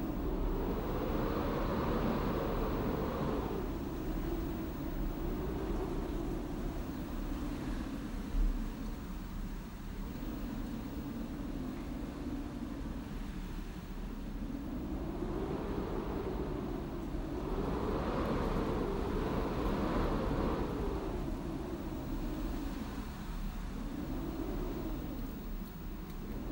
wind draft loop 1
An indoor recording of a strong wind/draft blowing through the window/door gaps, edited to loop seamlessly.
air, airflow, ambience, blow, current, door, draft, gap, gust, home, house, household, loop, nature, storm, strong, weather, wind, window